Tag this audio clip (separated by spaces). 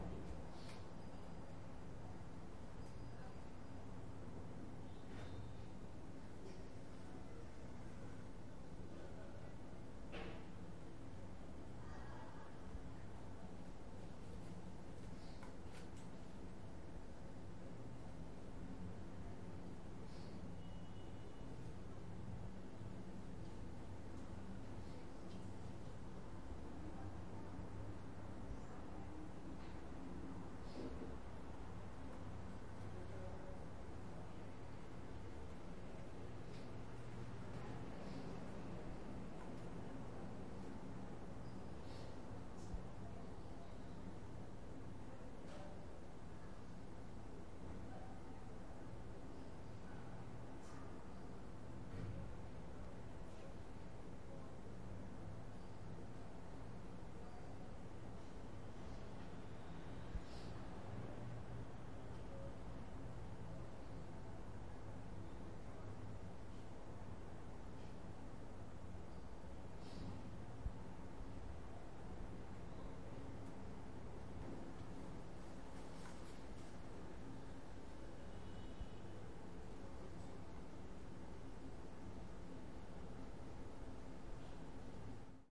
ambiente-interior-habitacion environnement-chambre-interieur indoor-room-ambience